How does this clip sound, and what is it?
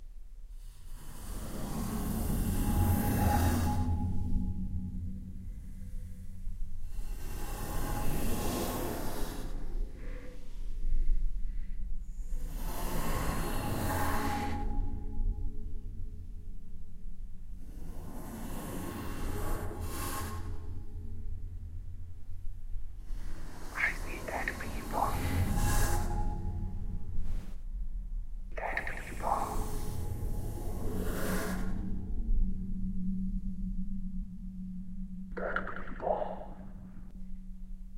I see dead people horror sound
I recorded myself running my fingers across a metal radiator, and myself saying I see dead people. I then edited it on audacity
creepy, dead, demon, devil, drama, eerie, evil, fear, fearful, ghost, gothic, Halloween, haunted, horror, I, I-see-dead-people, metal, mystery, nightmare, paranormal, people, phantom, radiator, scary, see, sinister, spectre, spooky, terror, thriller